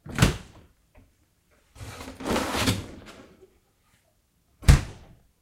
fridge oc 1
Opening fridge, taking out milk. Putting milk back, closing fridge.
kitchen, milk, door, fridge